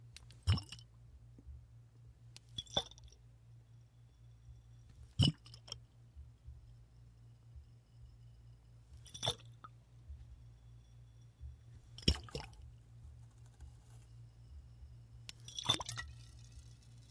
Sloshes In Bottle FF262
Liquid sloshes in bottle, liquid hitting inside of glass bottle, deep, slowly shaking bottle back and forth slower